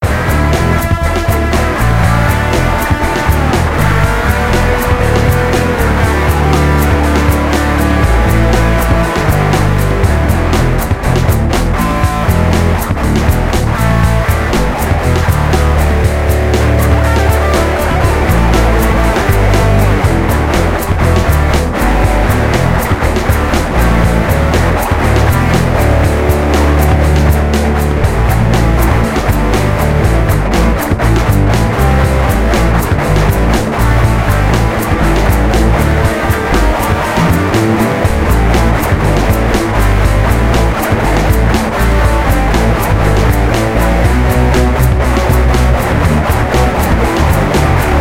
Fresh from my Zoom R8 to you. Hard Rock Loop 120 BPM key of E.